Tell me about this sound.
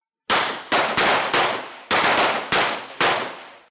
Revolver calibre 38 - vários disparos 01
Vários policiais disparando com revolver calibre 38.